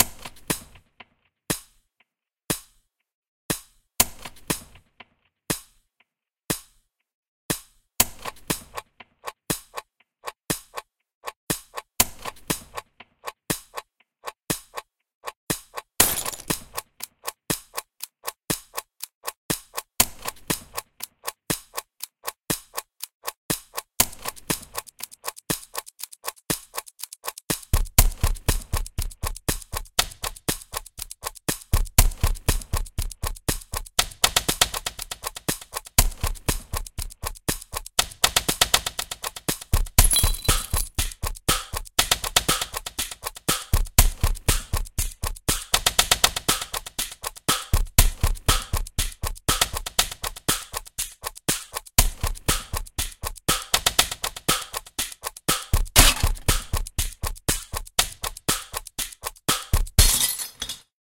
The 60-second ticking countdown effect.
60, tick, free, seconds, time, countdown, effect